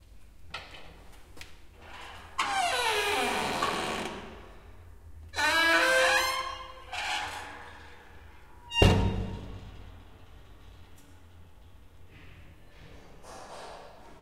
Door open with creak and close.
XY-stereo.
Recorded: 2013-07-28
Recorder: Tascam DR-40
See more in the package doorCreaking